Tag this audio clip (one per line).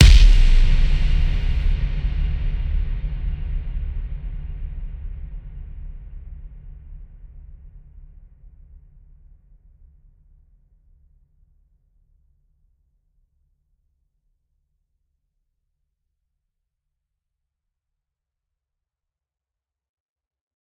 bassdrum
boom
huge
reverb
stacked